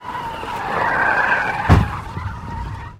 Sideswipe sound cue created by combining tire screeching and collision effects.
bang, carcrash, collision, crash, metal, sideswipe, vehicle